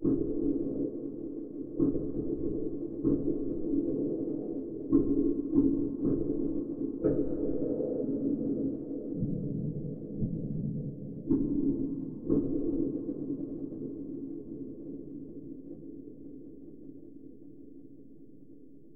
Synthetically made sound with reverbs and filtered. Enjoy!